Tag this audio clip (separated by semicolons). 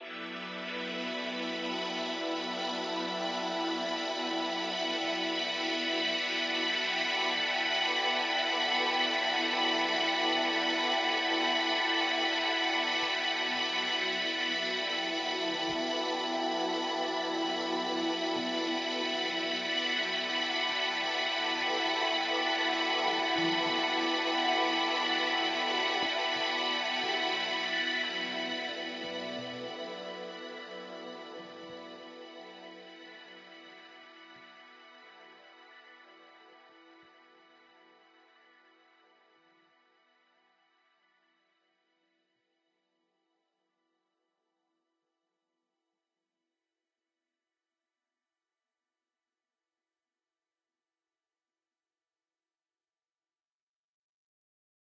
ambient; pad; soundscape; space; texture